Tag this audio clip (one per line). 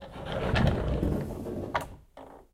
close
door
metalic
open
scrape
shut
sliding
wheels
wooden